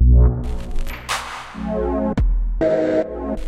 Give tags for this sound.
table-effects dub glitch fill broken-step

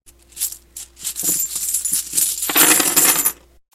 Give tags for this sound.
metal money

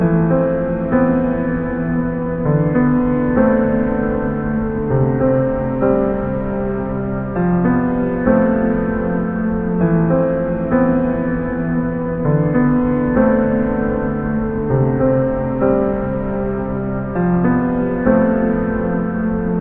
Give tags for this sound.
105bpm classic piano

